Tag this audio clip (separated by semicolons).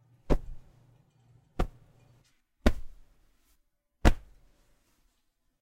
bump; colliding; hit; people; push